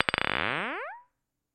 glass collide
This is a strange sound I came across by accident, the sound of two large glasses hitting each other. Recorded with an AT2020 mic into a modified Marantz PMD661.